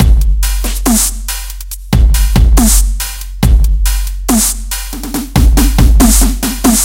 140 dubstep loop 3
Genre: Dubstep
Tempo: 140 BPM
Made in reason
Enjoy!
drum, dubstep, 140, hihat, snare, bpm, kick, loop